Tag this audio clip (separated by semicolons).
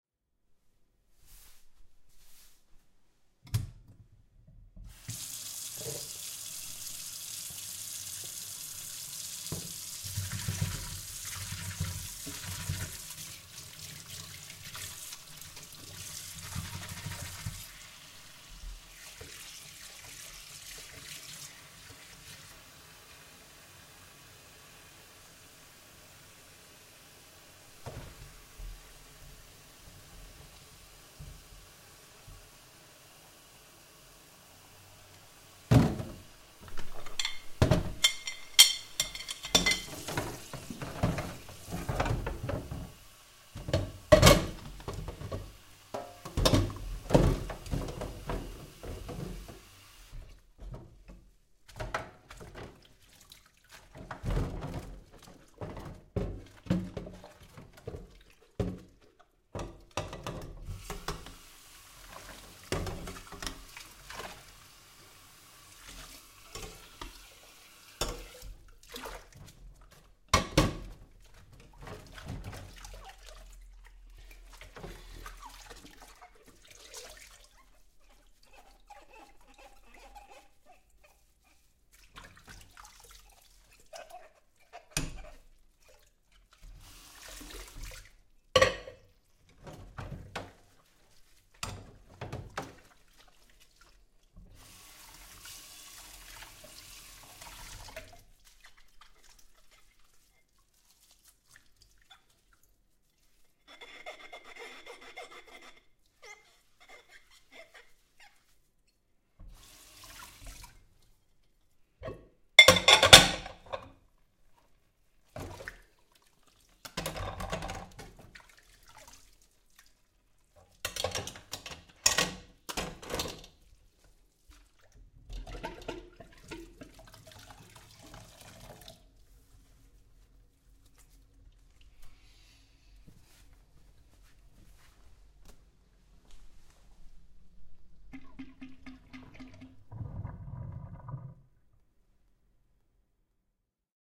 water; up; rinse; washing; dishes; wash; off